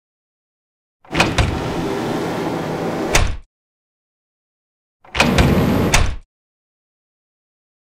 A sliding metal door opening. Different lengths (door sizes or speeds). Mix of following sounds in Audacity:
close, closing, door, entrance, game-sound, gate, gates, open, opening, slide, sliding